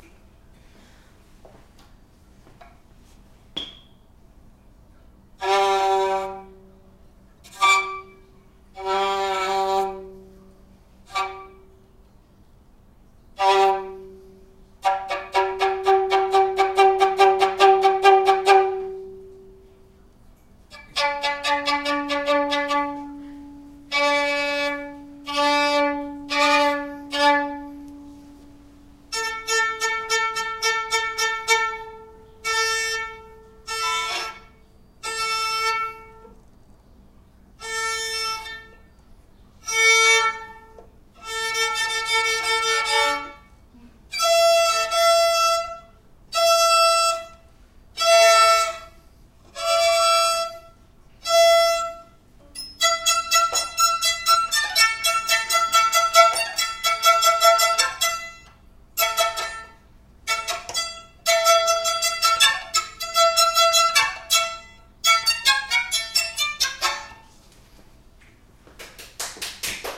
I recorded as 2 females and myself took turns playing and torturing a violin.